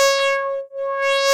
FAUGERON Amandine 2013 2014 son4
///Made using Audacity (only)
Generate Sound > Sawtooth > Frequence 500
Effect : Tremolo
Effect : Wah-Wah (LFO Frequence 0.6)
Select the first sound sequence (from 0 to 0.70 seconds)
Copy and paste it in a new track. Erase the first one.
Effect : Fade out
Effect : Repeat (1)
Effect : Change Pitch (Change % : +10)
/// Typologie
Continu tonique
///Morphologie
Masse: Son tonique
Timbre harmonique : Eclatant
Grain : lisse
Allure : Pas de vibrato
Dynamique : Attaque abrupte
Profil mélodique : Serpentine
Profil de masse : pas d’équalisation
surveilance, Zoom, digital-sound, camera, unzoom